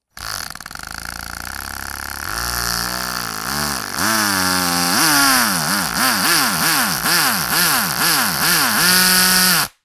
Drill Held Medley
Friction, Plastic, Bang, Hit, Tools, Impact, Tool, Steel, Crash, Metal, Boom, Smash